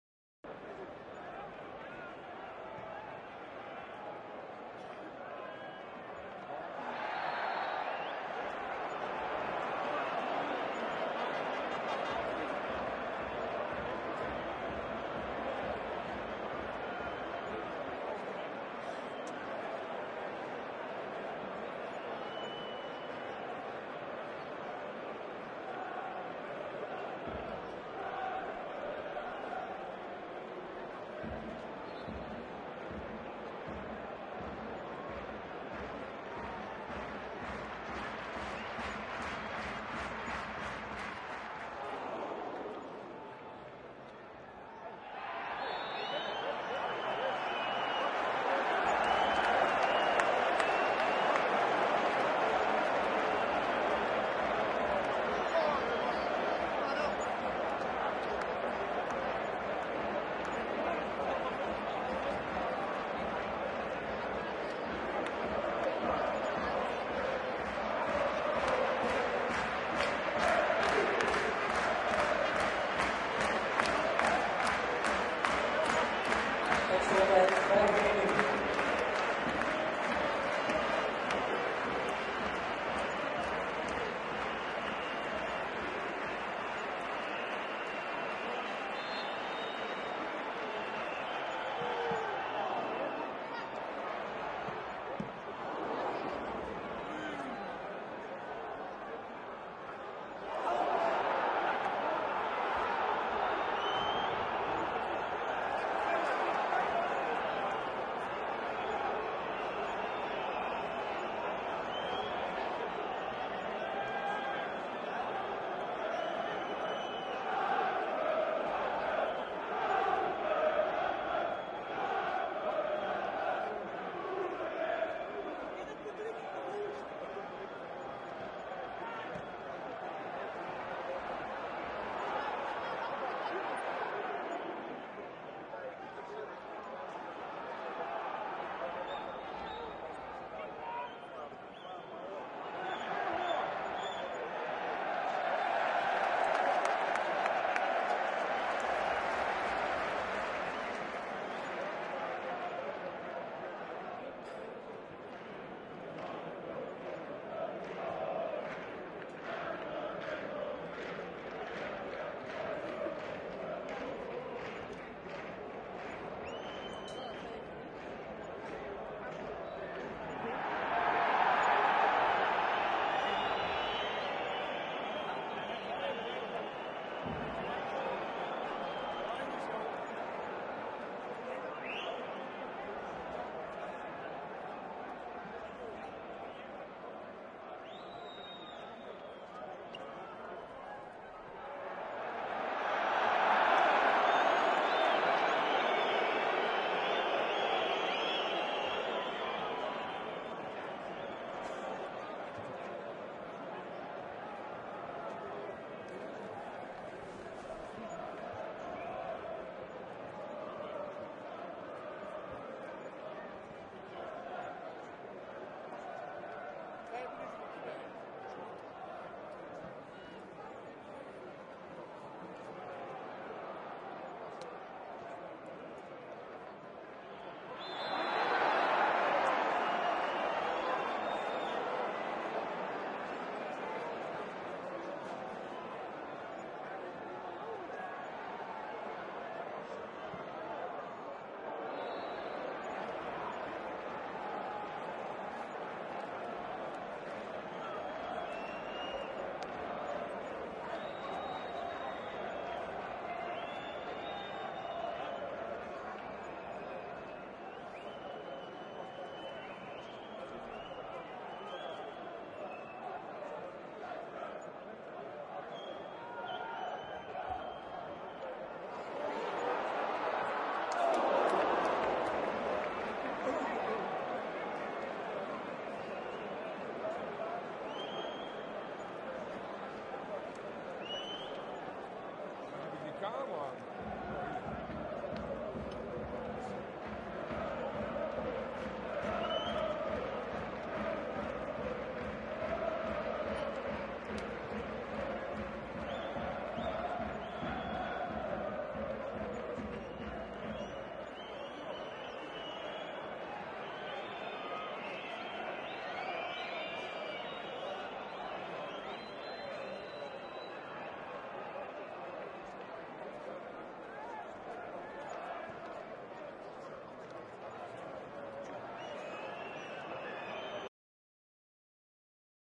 Heerenveen Stadion
Atmo from the Abe Lenstra Stadium in Heerenveen, Friesland, Netherlands, recorded 29-4-07.
Recorder Aaton Cantar-X, Mic Neumann RSM 191i, XY.